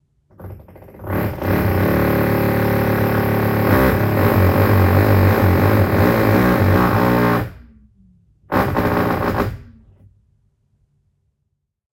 iPhone 12 recording (stereo sound extracted from video) of somebody using a drill on the other side of a not very thick wall.